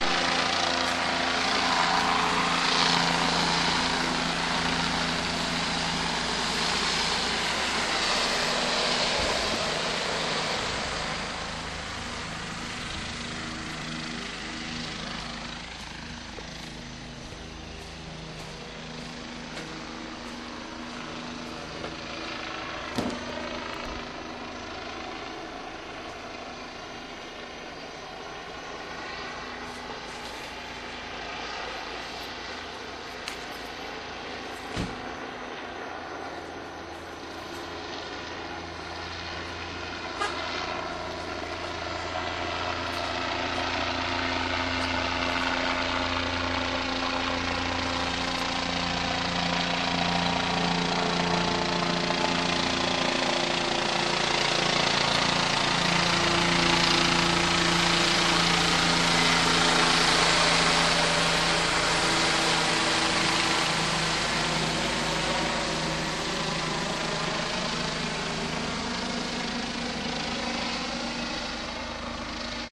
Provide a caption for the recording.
police chopper car
Police helicopter and a dozen cop cars, including a K-9 unit searching the hood, recorded with DS-40 and edited in Wavosaur. Walking out to the car while chopper circles overhead.